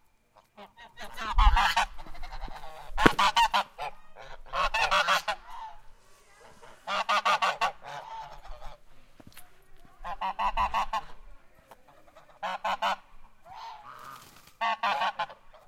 cibolo geese06
Geese honking at Cibolo Creek Ranch in west Texas.
honking, animal, texas, geese